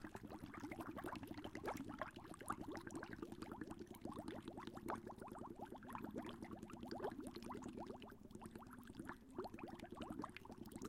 Bubbles3 Zoom
sound of bubbles in a cup
good for looping hopefully.
recorded with Zoom handy HN1
folley, Bubbles, SFX